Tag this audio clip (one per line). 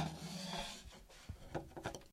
hiss
cloth
swish
metal
object
fabric
slide